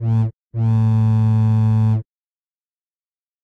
artificially created ship horn sound for amateur movie
boat, horn, ship